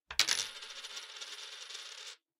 coin or money spinning on a wooden or plastic table
coin, money, spinning, table